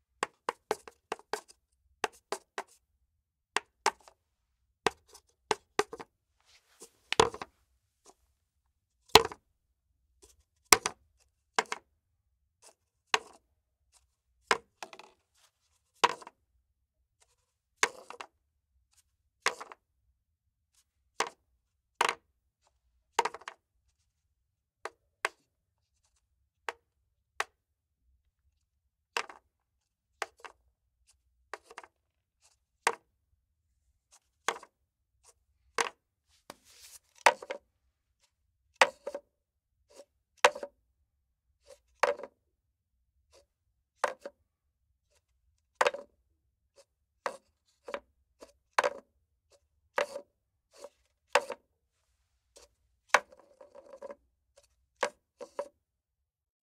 Coffee Cup On Table Bounce Lid Drop Rattle
preamp, studio-recording, Cup, Lid, UA, Coffee, On